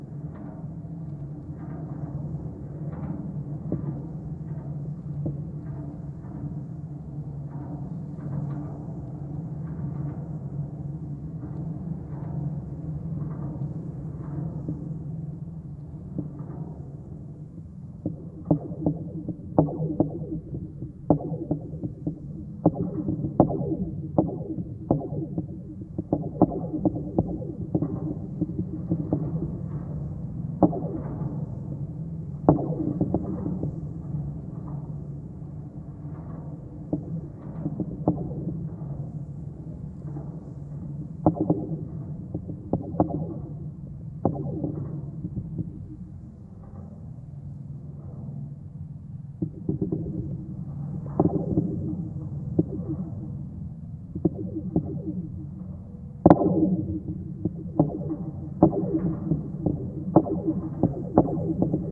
GGB A0221 suspender NE17SW
Contact mic recording of the Golden Gate Bridge in San Francisco, CA, USA at the northeast approach, suspender #17. Recorded October 18, 2009 using a Sony PCM-D50 recorder with Schertler DYN-E-SET wired mic.
Sony-PCM-D50, DYN-E-SET, bridge, wikiGong, field-recording, Schertler, steel, metal, Golden-Gate-Bridge, contact-mic, contact, microphone, contact-microphone, cable